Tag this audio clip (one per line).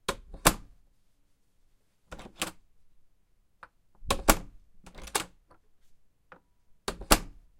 door
open